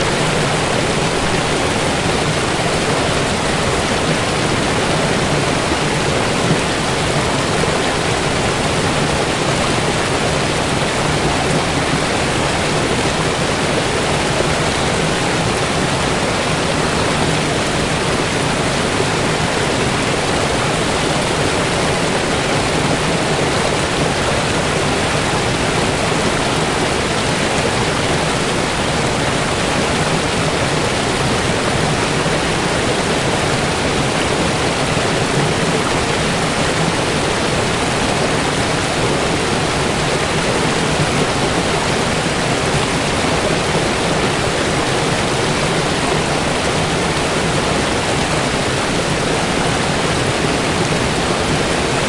waterfall ypsilon rmnp 02
A waterfall that feeds into Ypsilon Lake, about 40 feet from the lake itself. Recorded in Rocky Mountain National Park on 17 August 2008 using a Zoom H4 recorder. Light editing work done in Peak.
lake, colorado, national, park, ypsilon, rocky, hike, mountain, waterfall